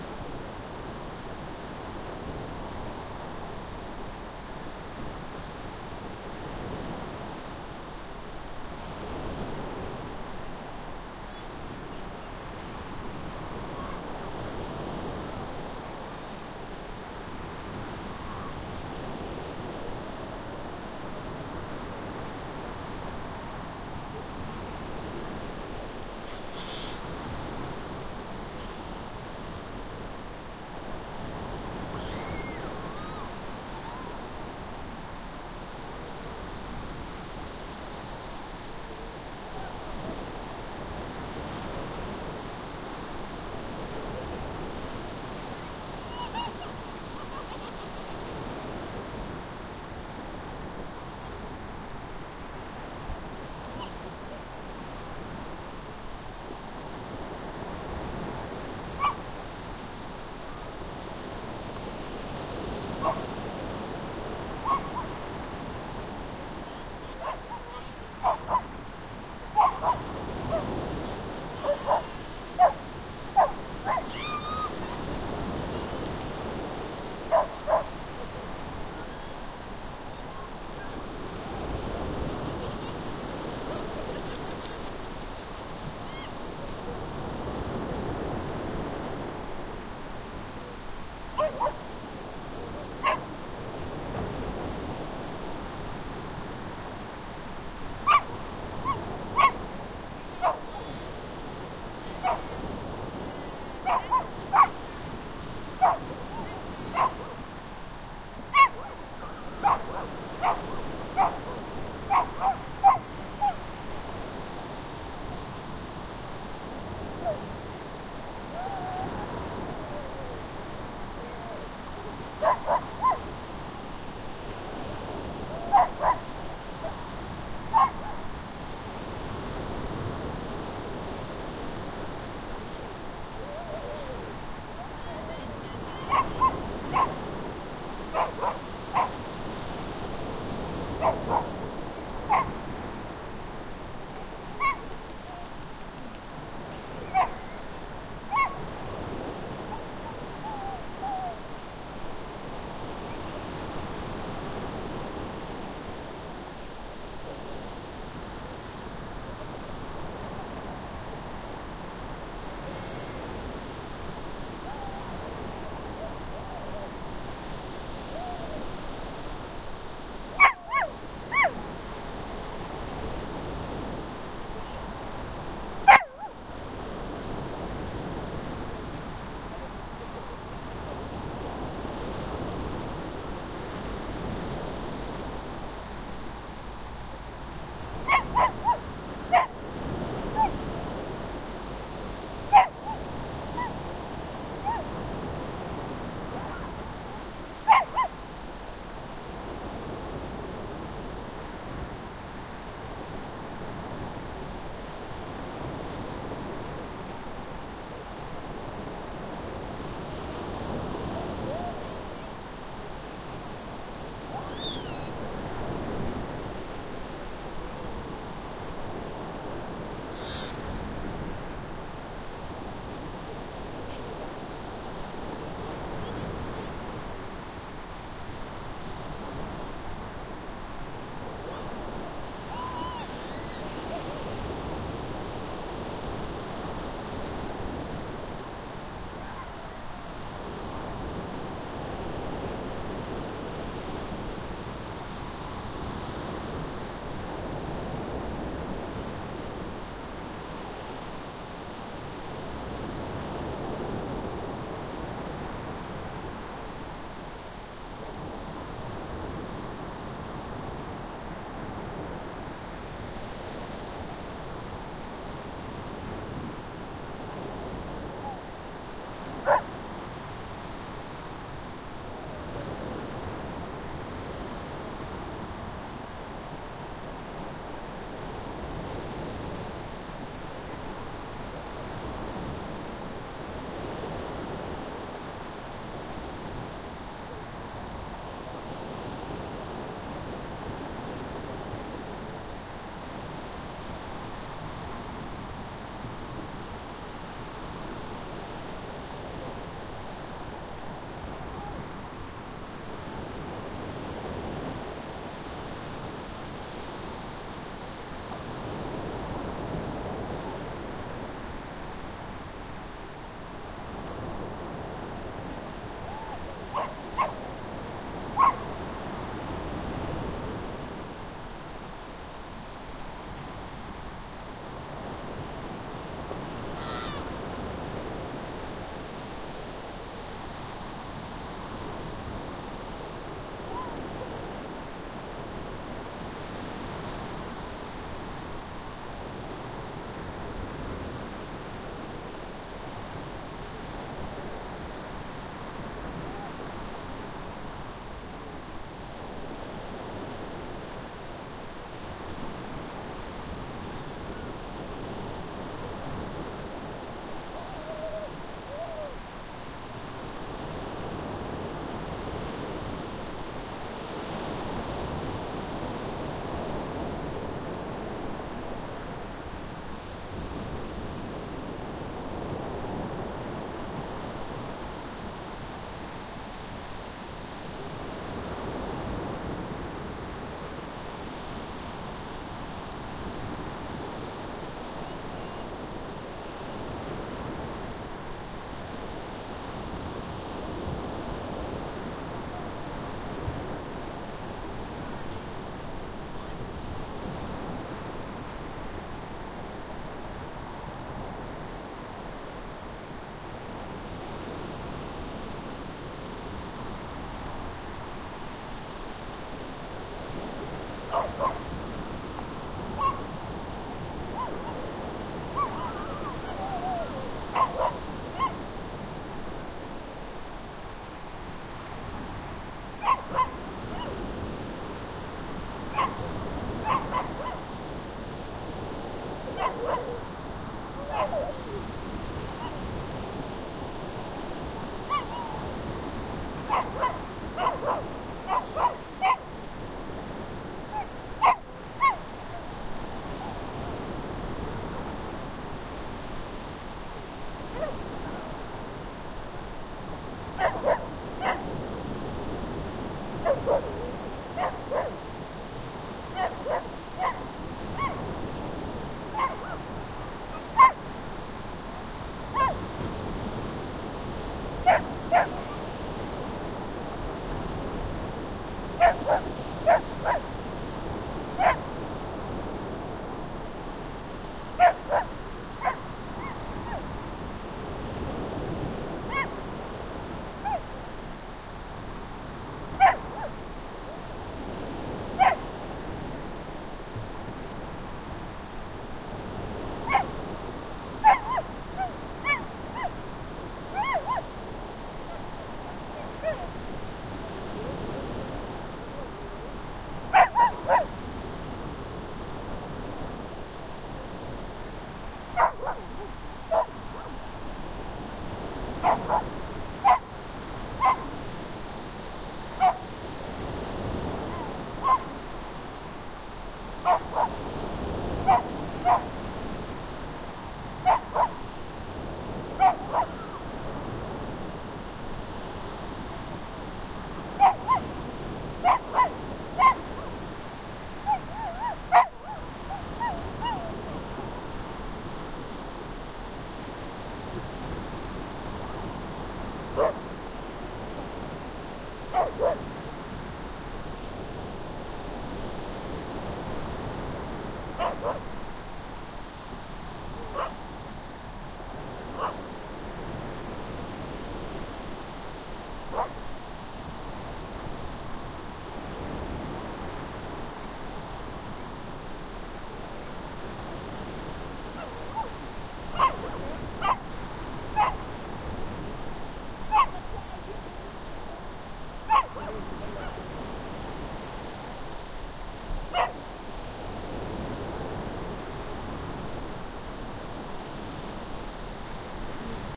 Recorded at the beach of west coast, in northern Denmark. A lot of waves, some people in the water and a dog barking, not far away from the mics. Sony HI-MD walkman MZ-NH1 minidisc recorder and two Shure WL183